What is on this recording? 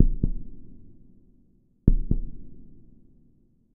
Heart Beating 128bpm
Heart Beating 128 bpm
Beating, Deep, Free, Hall, Heart, Hit, Impact